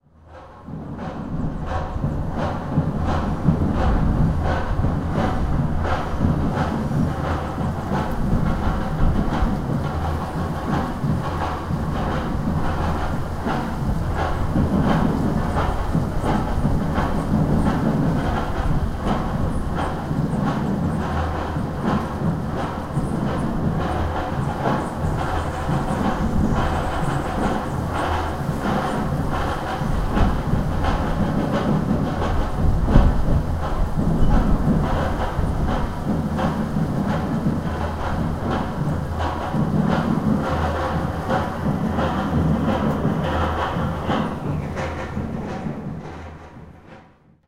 Old town, Salvador, Brazil, You hear the distant drumming of a so-called “Bloco-Afro”,
a typical drum-band in this part of Brazil, some birdcalls from the
backyards and the urban soundmix like in every big town.Dat-recorder, unprocessed, just fading in and out.